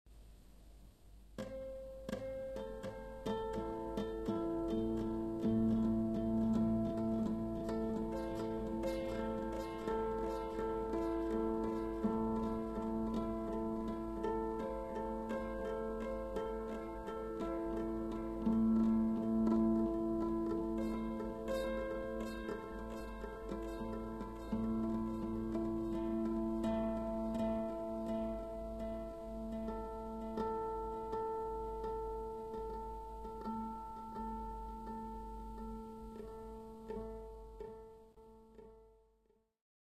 Guitar harmonics A minor
a, echo, guitar, harmonics, minor, slowly